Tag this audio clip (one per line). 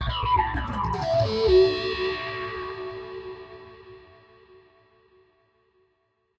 electronic
synth
electro
music-box
atmosphere
distortion
music
processed
rhythmic
noise